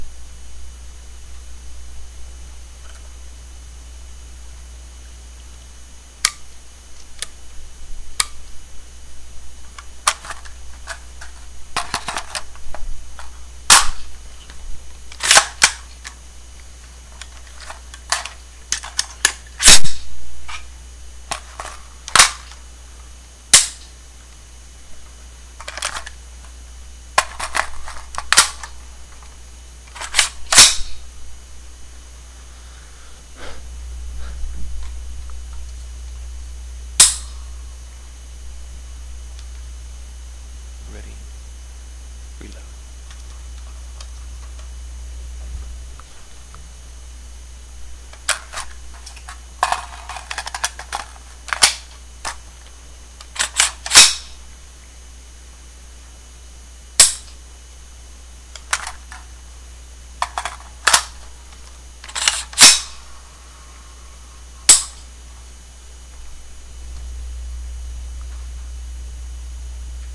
An AR-15 being handled. I used a small desktop mic (not sure of the brand name, but it was pretty cheap), recording directly into my computer. Recorded in a small room. Cocking, dry-firing, magazine removal/insertion, etc. included on the recording.